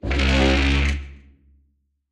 chair dragon groan
Processed recordings of dragon a chair across a wooden floor.
beast, monster, creature, roar, call, growl, dragon